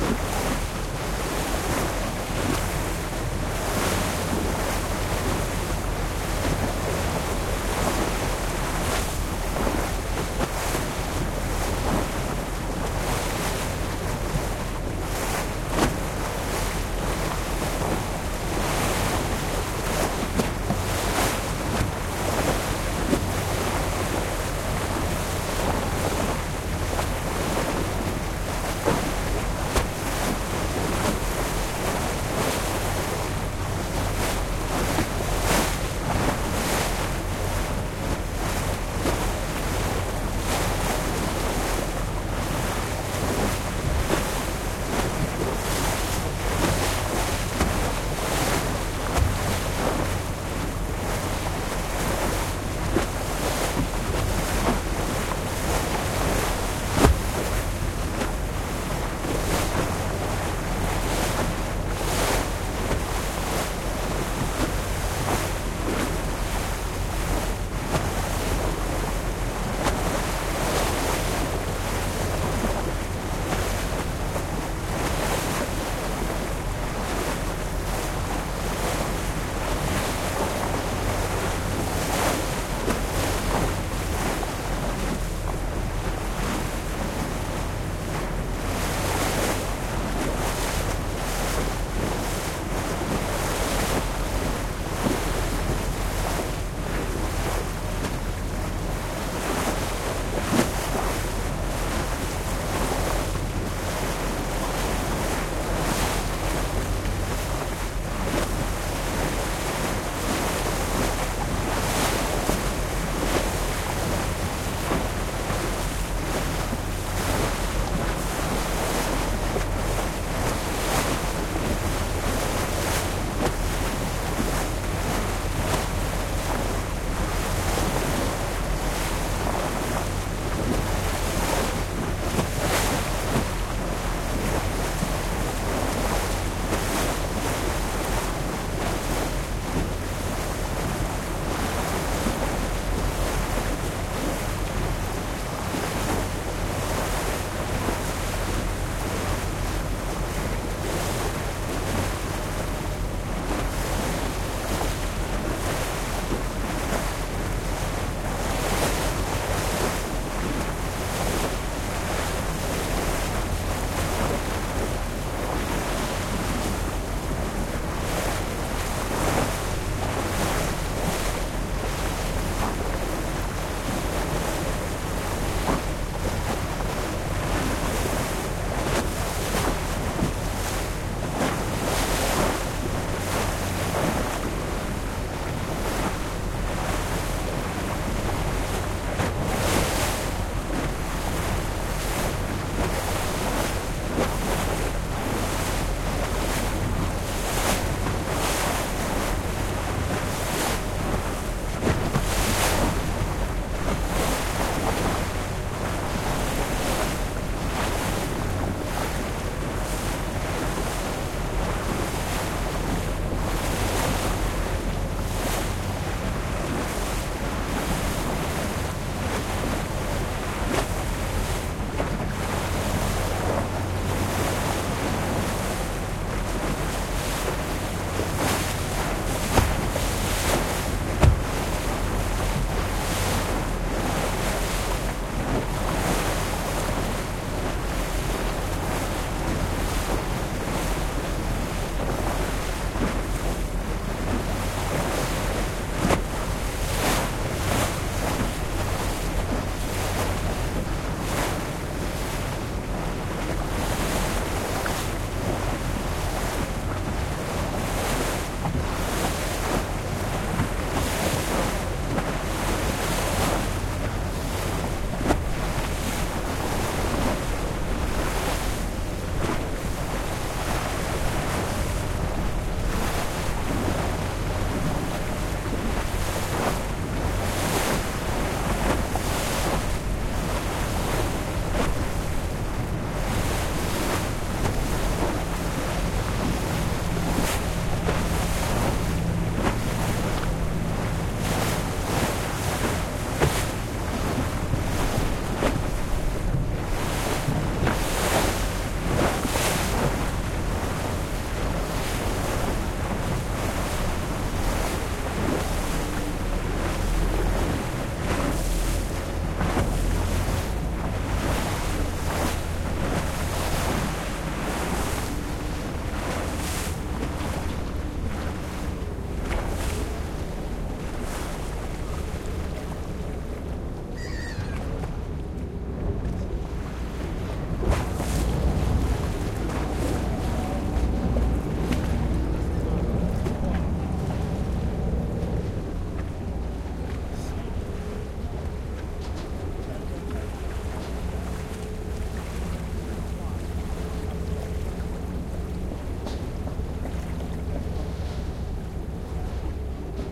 111011 - Venedig - Hilton Shuttleboot 2
On the shuttle boat from Hilton Venice to St. Marcus
venice, boat, waves